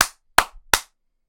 A series of three claps slowly spaced out
clap, hands, slap